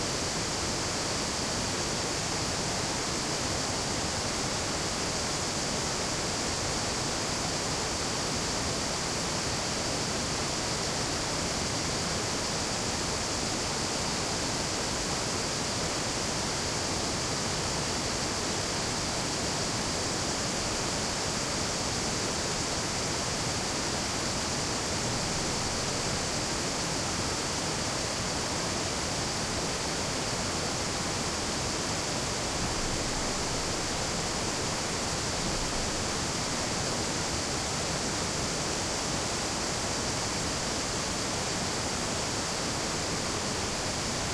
130717 Krka Waterfall2 F 4824
Surround recording of the waterfalls in Krka/Croatia. Wide-angle recording of the falls in front, with some tourist voices in the rear channels. It is high summer, crickets are chirping audibly. A small brook flowing to the falls from right to left can be heard directly in front.
Recorded with a Zoom H2.
This file contains the front channels, recorded with a dispersion of 90°
atmo, field-recording, loud, nature, noise, people, river, summer, surround, water, waterfall, wide, wide-angle